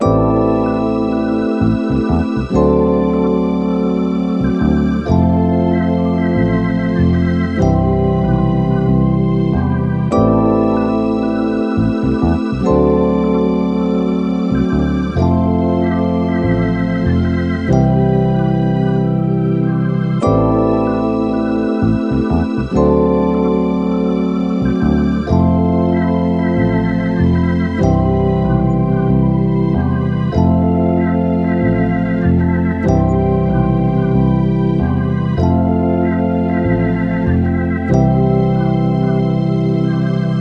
Chill Lofi Melody Loop 95 BPM
samples, out, relax, hiphop, melody, lofi, pack, loop, beats, lo-fi, beat, loops, music, sample, 95, bpm, chill